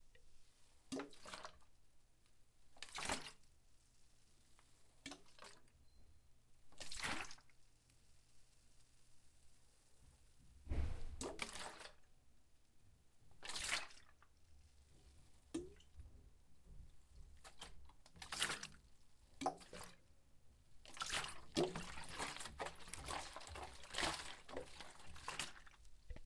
Water in bottle
Water in a bottle
Bottle, Splash, Water, In